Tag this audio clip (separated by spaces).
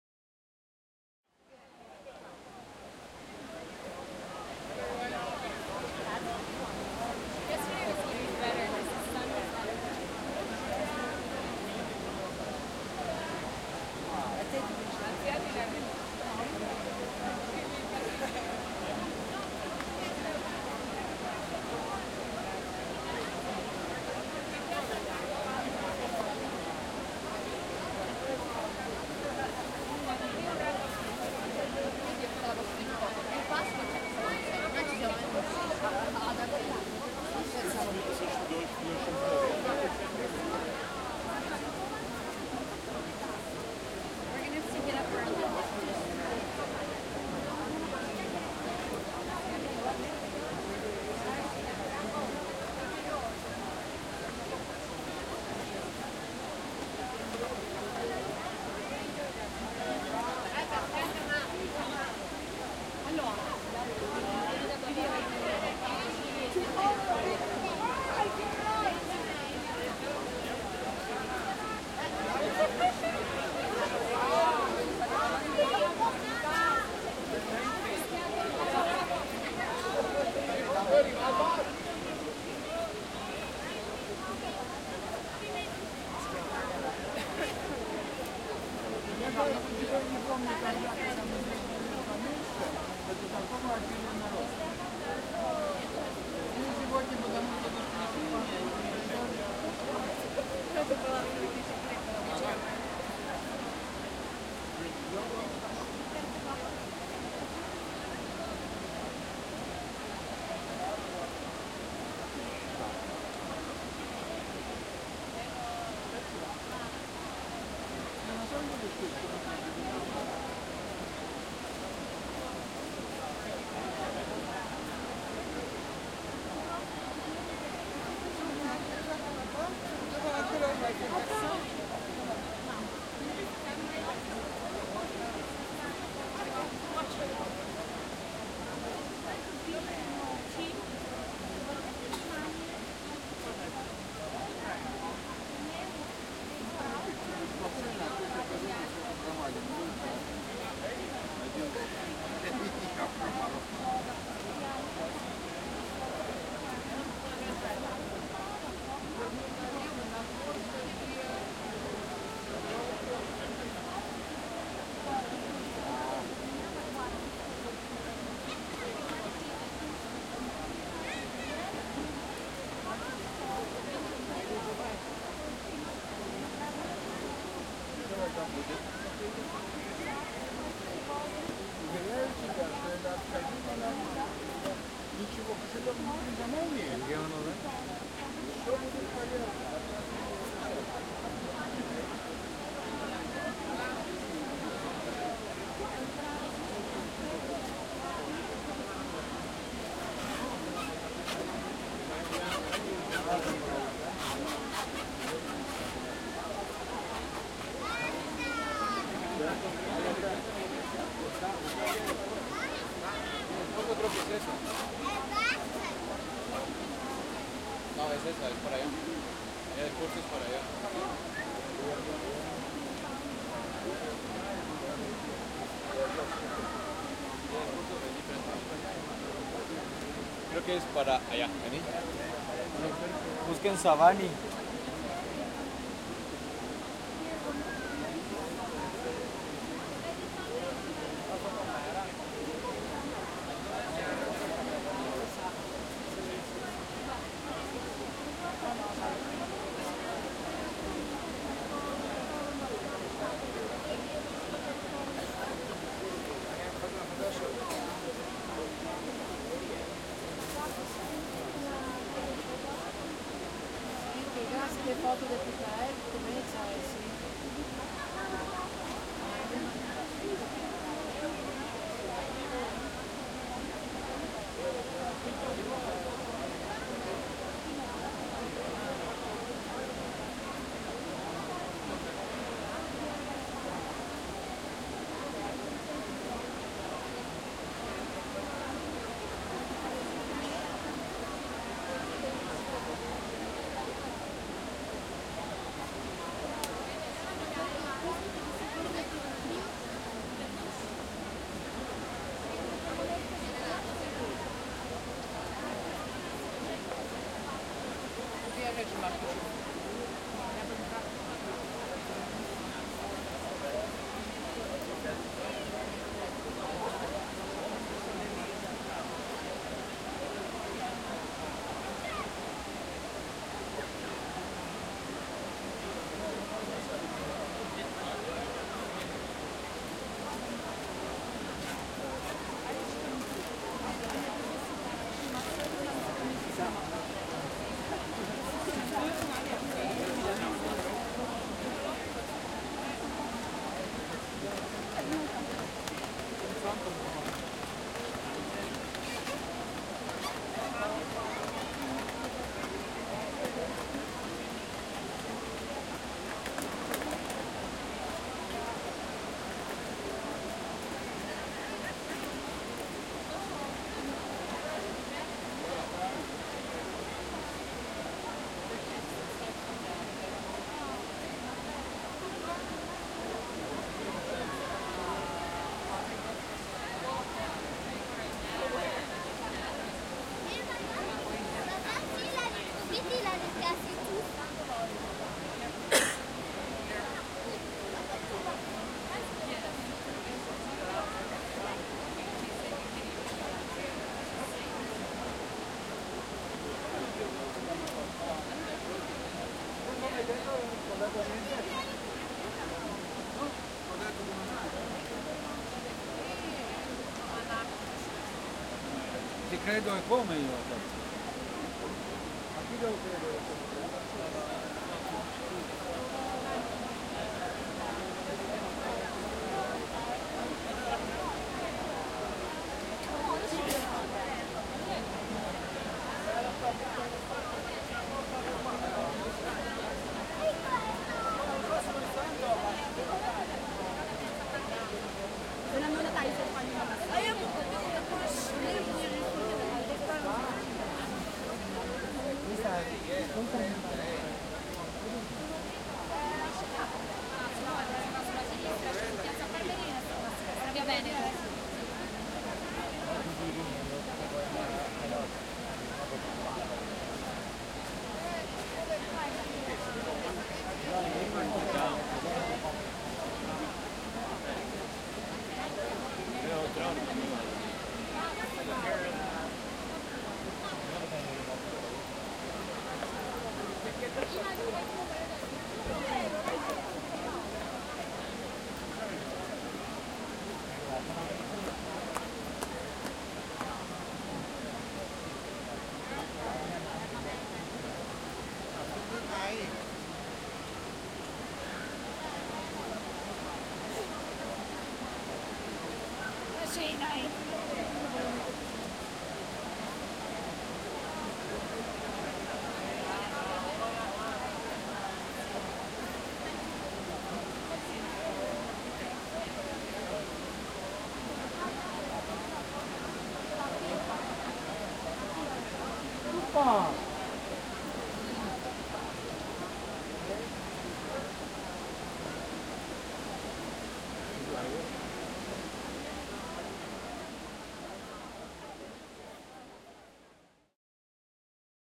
crowd people steps voices water field-recording Roma